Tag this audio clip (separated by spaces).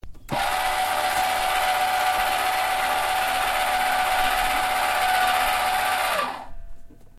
winch electric actuator